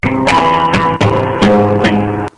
Also used this in a song called "Lullaby". The line was supposed to sound somewhat like a lullaby.
guitar, noise, loud, lo-fi